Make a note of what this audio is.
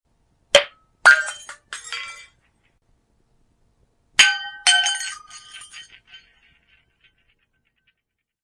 Bouncing bulb crash
A recording of some tough lightbulbs that just wouldn't pop!
light, tinkle, crash, light-bulb, bulb, glass, smash